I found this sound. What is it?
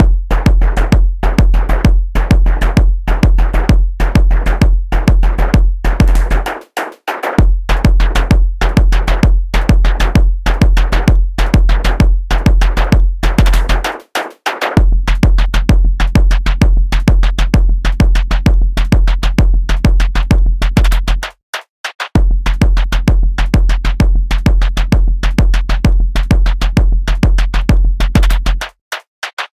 A dark driving drum loop with lots of syncopated components.
[BPM: ]
[Key: F minor]